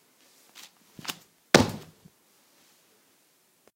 An example where this sound might be useful could be a post office employee who stamps a letter.
mail, postmark, stamping, office, letter, stamp, agaxly, post